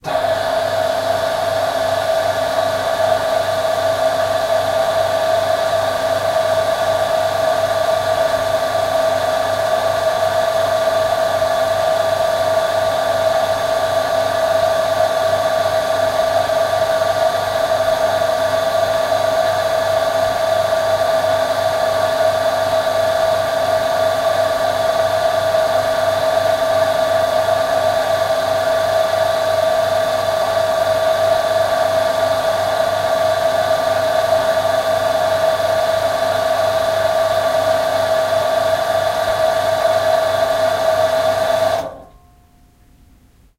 Sound of a water pump. This machine pumps a water from the well to the building. Recorded with Zoom H1 internal mic.